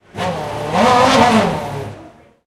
F1 BR 06 Engine Starts 9
Formula1 Brazil 2006 race. engine starts "MD MZR50" "Mic ECM907"